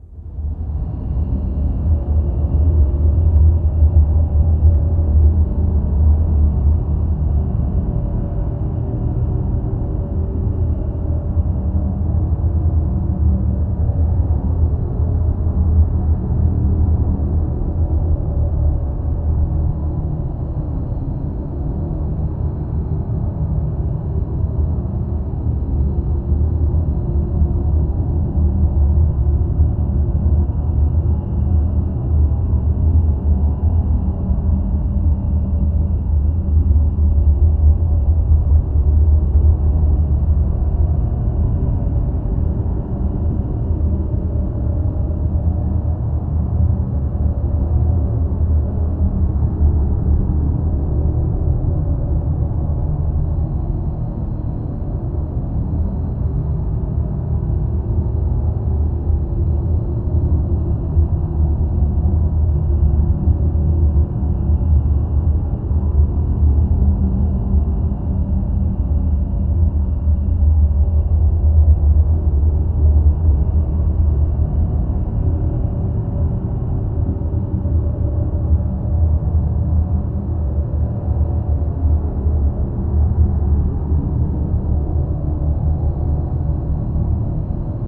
Atmospheric sound for any horror movie or soundtrack.
Atmosphere, Scary